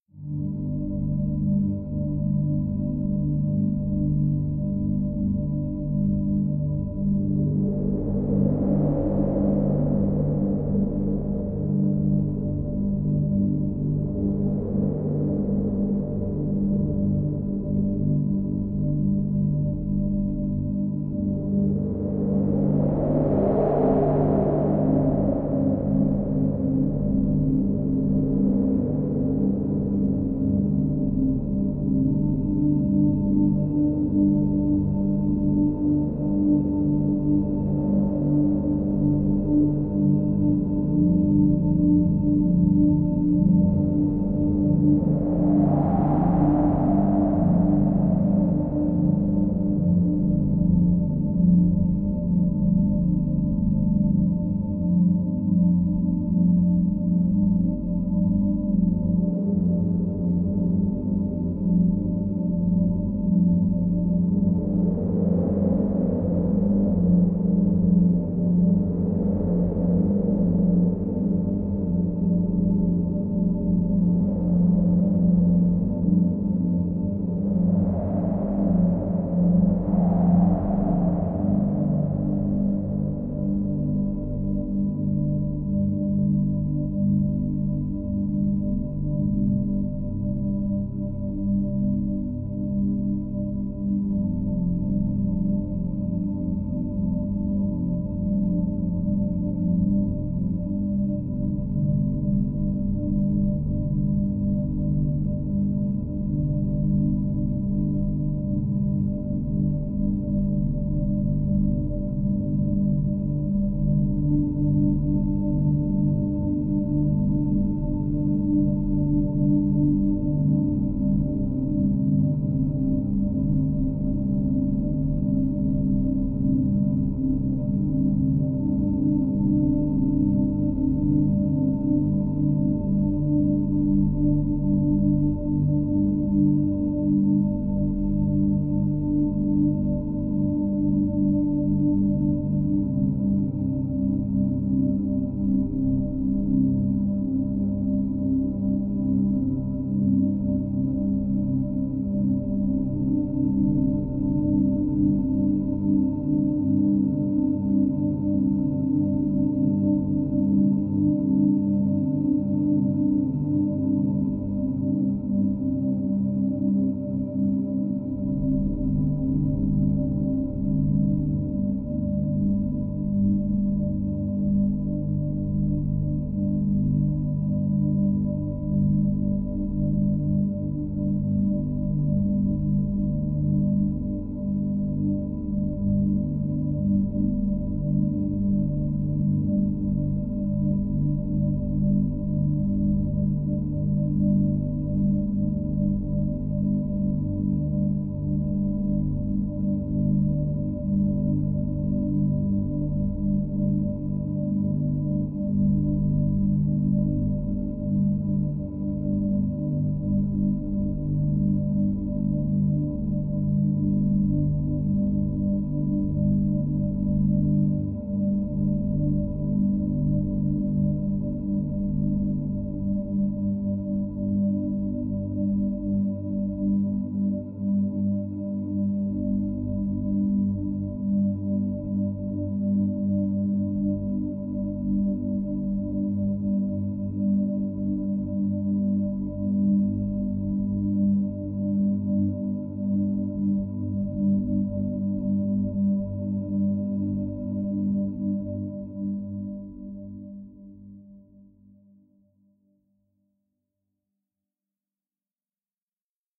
alien sky
A long dark dynamic ambient soundscape. This simple track is suitable for using as an ambient soundtrack to a video or a game.
waiting, ambient, free, soundtrack, catastrophy, soundscape, ambience, long, slow, wind, theme, dynamic, creepy, alien, broken, drone, sad, scary, dark, sky, wait, atmosphere, atmospheric, music